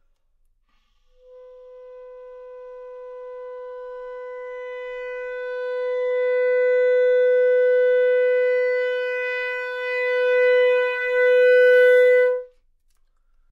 Clarinet - B4 - bad-dynamics-crescendo
Part of the Good-sounds dataset of monophonic instrumental sounds.
instrument::clarinet
note::B
octave::4
midi note::59
good-sounds-id::740
Intentionally played as an example of bad-dynamics-crescendo
clarinet, multisample, B4, neumann-U87, single-note, good-sounds